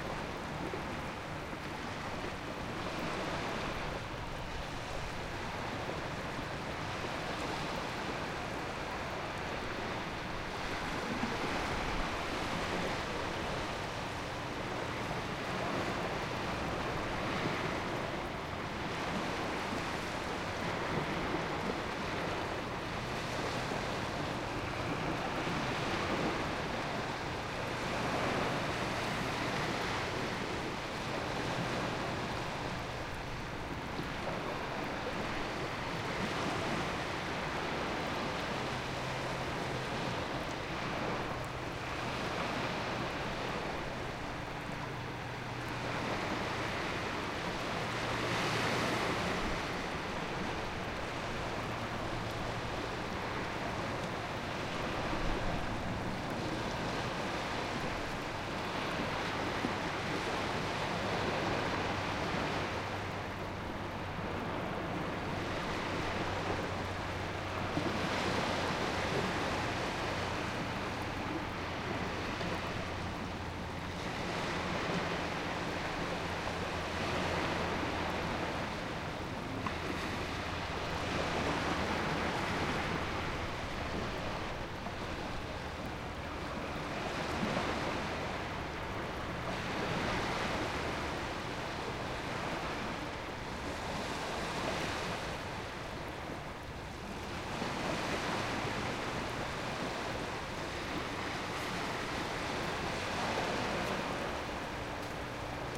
sea-waves
Stereo recording of waves on a sandy beach, recorded from the shelter provided by some rocks, with a faint engine hum from a large ship in the far background. Tide was about one hour after turn and coming in. Recorded across an estuary. Average level about -18dB. Recorded using H2 Zoom with front microphones (90 degree). 6dB of amplification in Audacity.
estuary, field-recording, beach, tide, beach-sound, waves, sea